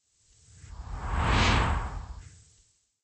just a sweep